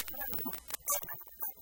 vtech circuit bend011
Produce by overdriving, short circuiting, bending and just messing up a v-tech speak and spell typed unit. Very fun easy to mangle with some really interesting results.
music, circuit-bending, digital, noise, speak-and-spell, broken-toy, micro